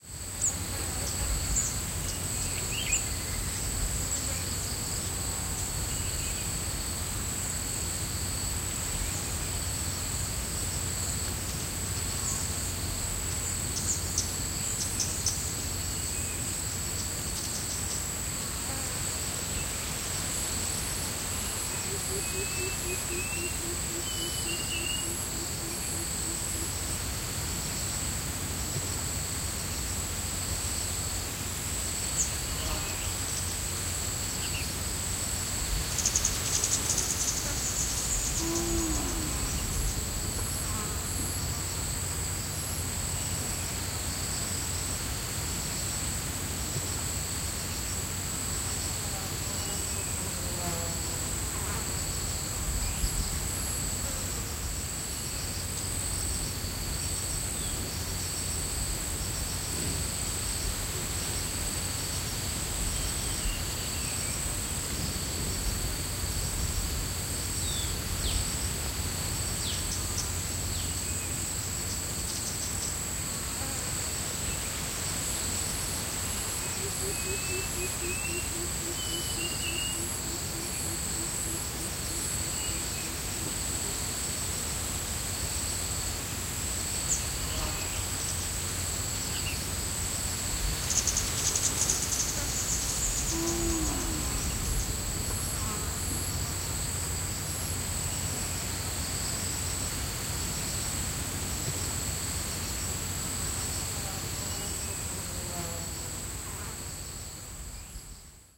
Doi bo ambi 02 wind up mountain
Up a mountain, Doi Bo, near Chiang Ra. Wind, Bees, and crickets. Sunny day with a breeze. Nice Ambiance. North-Thailand.
Recorder with the Sony PCM D100, Built-in Mics.
Daytime, Recording, Crickets, Field, Wind, Mountain, South-East-Asia, Thailand